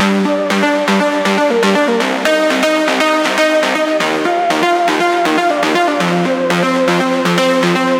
Alesis Andromeda A6 - Pure and perfect sound. This one DEEP HOUSE melody loop was created by this powerful synthesizer.
This is for Your HITS!
Cheers!
ANALOG, SYNTH, 2015, FREE, Andromeda, HIT, DEEP